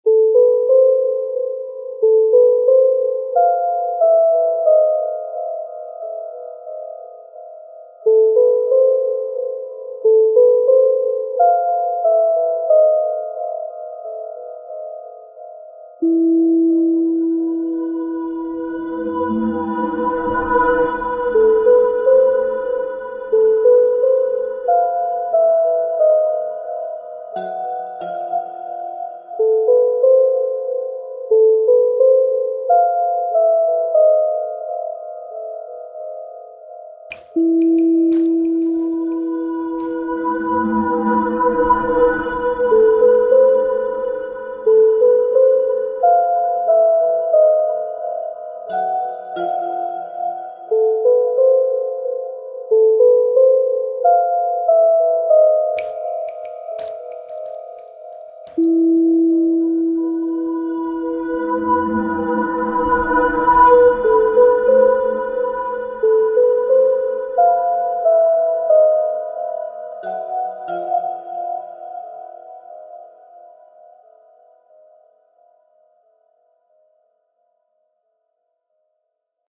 Wicked and mysterious music
Music I made in GarageBand to Victors Crypt but you can use it to whatever :)
I thinks it suits very good to suspence, something very mysterious, spooky. Hope you like it!
drama, intro, delusion, ghost, frightful, suspense, fearful, hell, macabre, anxious, creepy, phantom, Eerie, thrill, scary, dramatic, fear, terrifying, horror, haunted, sinister, bogey, background-sound, nightmare, Gothic, terror, spooky